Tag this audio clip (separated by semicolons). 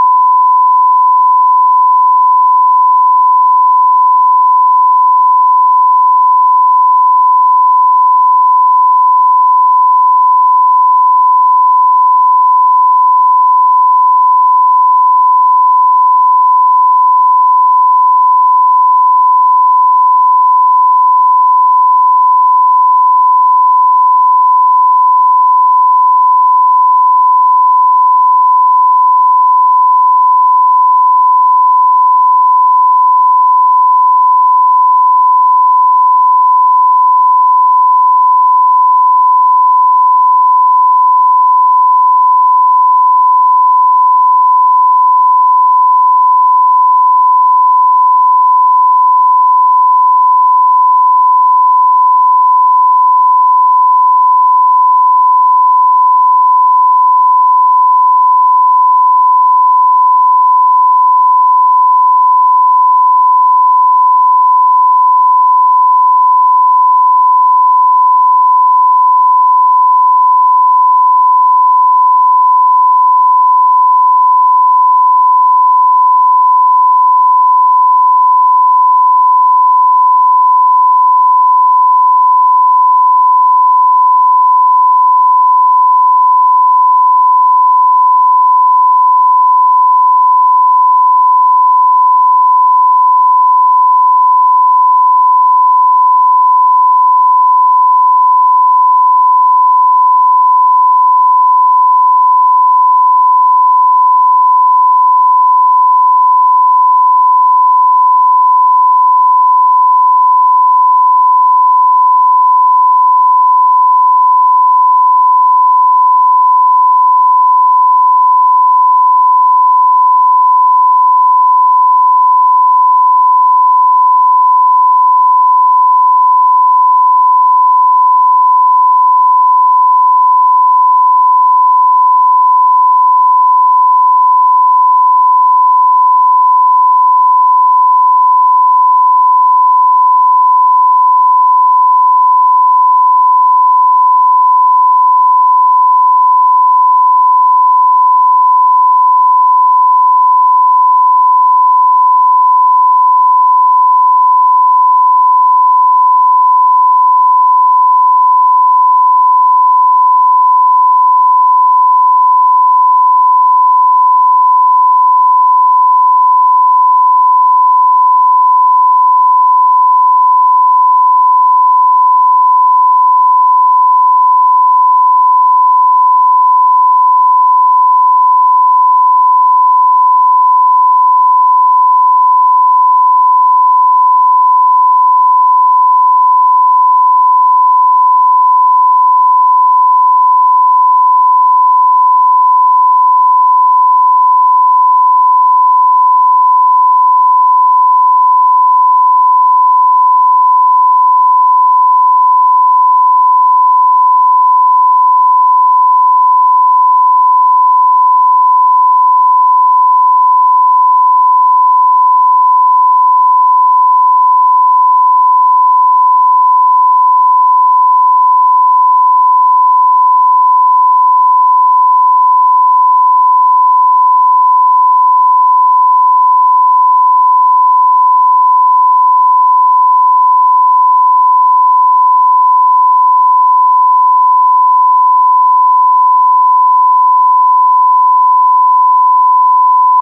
electric; sound; synthetic